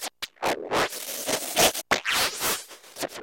an angry synthesized dog and cat going at it.
TwEak the Mods